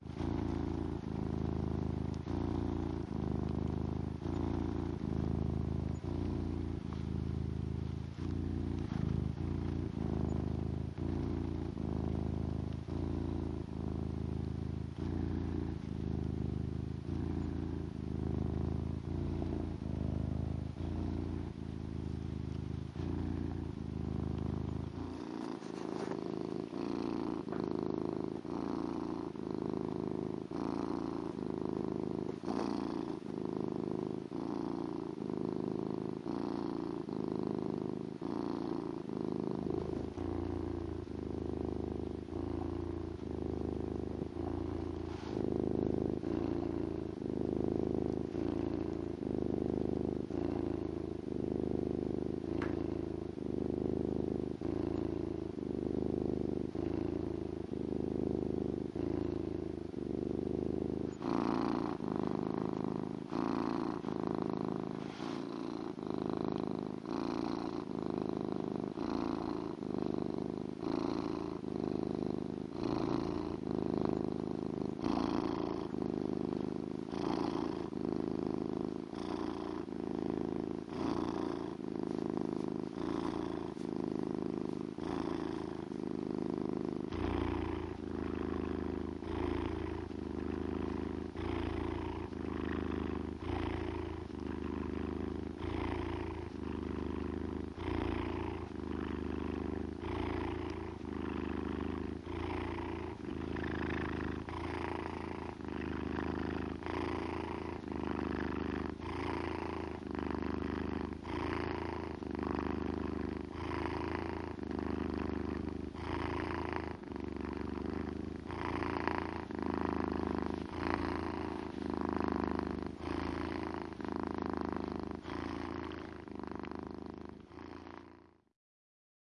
112 Batman-ronkt-compilation
The cat "Batman" purrs in the field-recorder Olympus LS-10.
cat, purr, cats, animal, purring